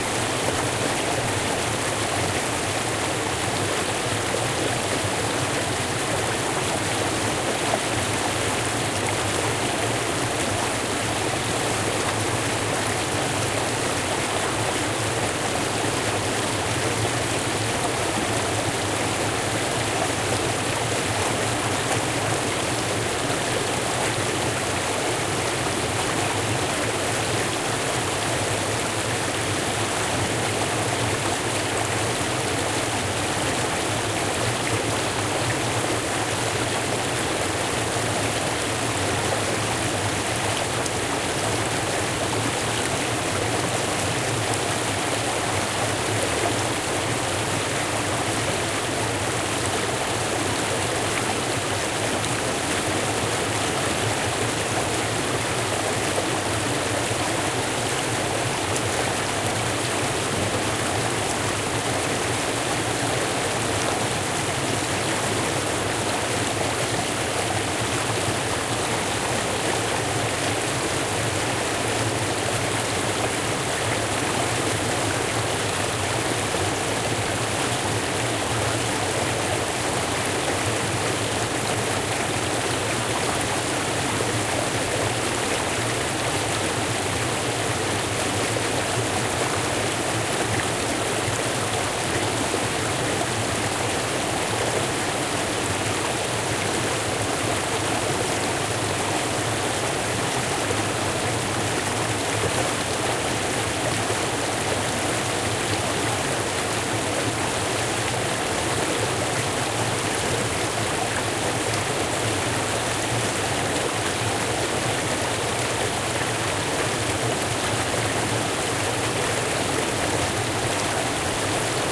Water stream calmed 4
Recording of a water stream in "les fonts del llobregat", next to "Castellar de n'Hug" (see geotag). Almost no background noise.
The recording was made with a Zoom H4n, and is part of the pack "Fonts del Llobregat" where you'll find similar recordings made at different distances from the river.
calmed, stream, h4n, water, river, field-recording